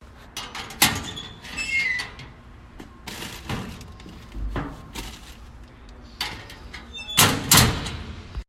School locker sounds. Recorded with iphone. Edited in Logic.